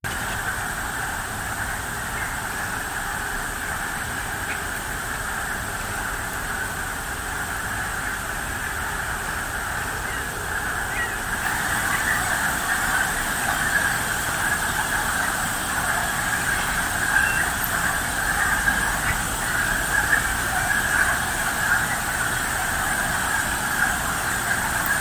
Berkshire Mt Frogs 01
A simple field recording of mating frogs in the wetlands of western Massachusetts in the Berkshire Mountains, USA. Early spring frogs mating activity. A simple recording using an iPhone on a wetland hike. I cleaned the recording up a bit using Audacity. Minor tweaks, Light EQ and Normalizing.
Mating-Frogs, Berkshires, Sheffield, Mating, Twilight, Nature, Wetland-Conservation, Frogs, 01257, Reproduction, New-England, Wetlands, Field-Recording, Outdoors, Massachusetts, Swamp, Berkshire-Mountains, Spring